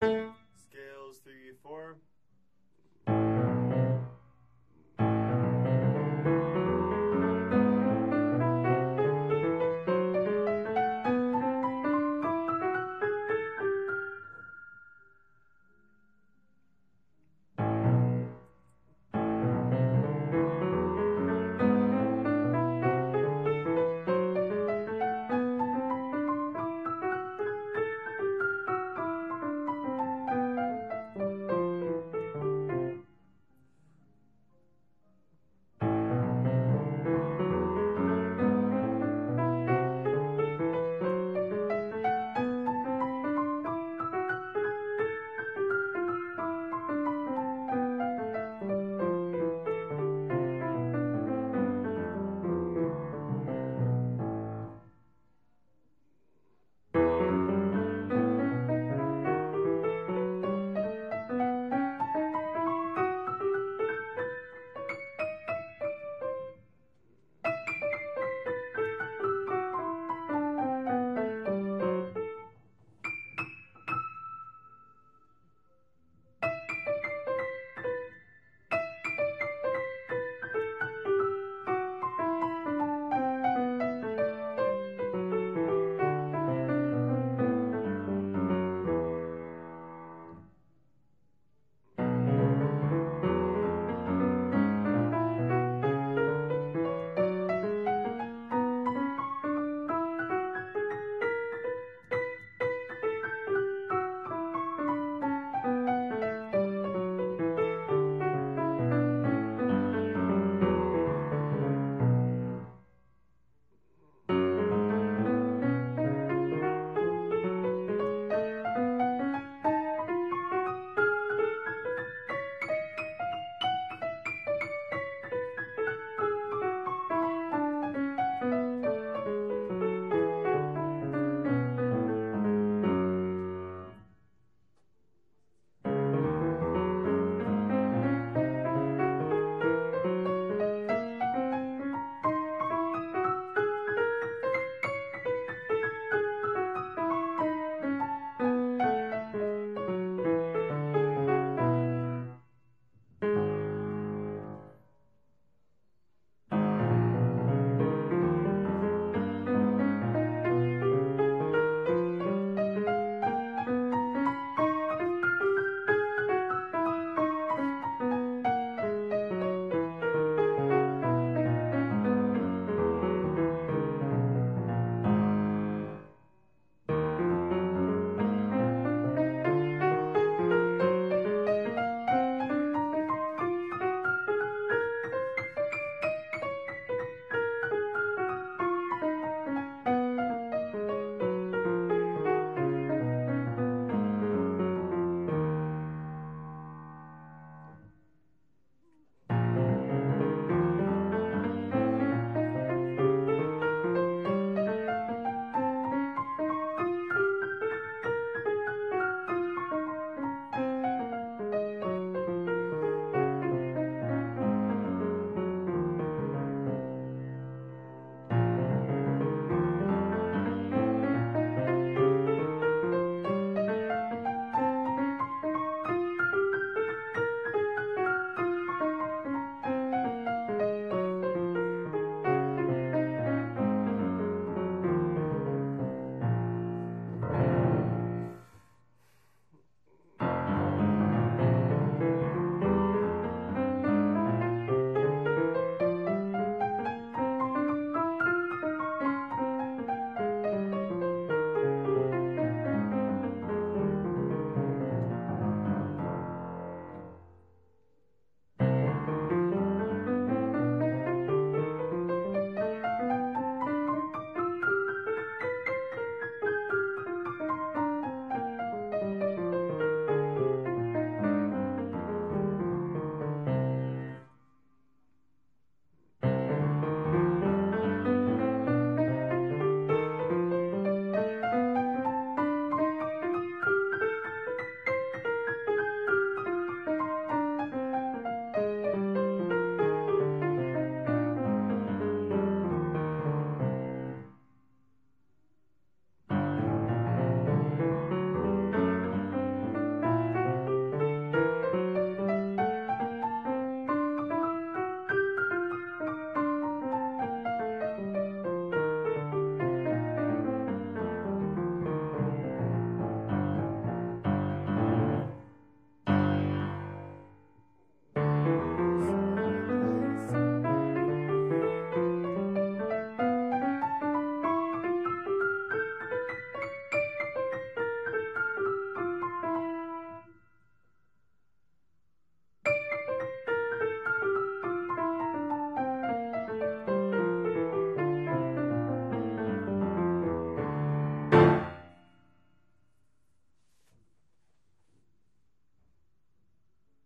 Practice Files from one day of Piano Practice (140502)
Piano, Practice, Logging